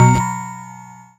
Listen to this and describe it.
PPG 006 Digital Mallet G#1
This sample is part of the "PPG
MULTISAMPLE 006 Digital Mallet" sample pack. It is a short bell sound
with some harsh digital distorion above it, especially at the higher
pitches. In the sample pack there are 16 samples evenly spread across 5
octaves (C1 till C6). The note in the sample name (C, E or G#) does not
indicate the pitch of the sound but the key on my keyboard. The sound
was created on the PPG VSTi. After that normalising and fades where applied within Cubase SX.
short, multisample